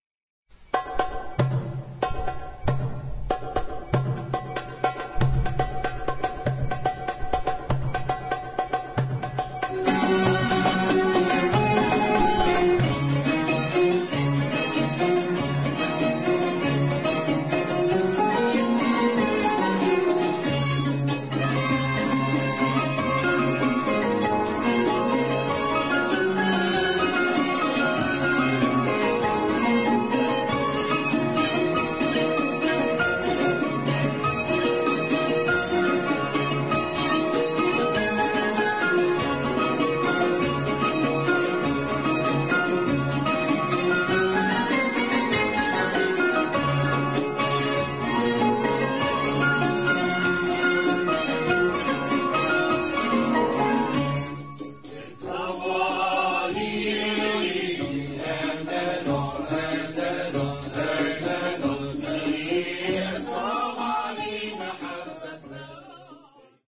Bassit Quddám rhythm with ornaments, applied to the San'a "Ya ghazali" of the mizan Quddám of the nawba Rasd Dayl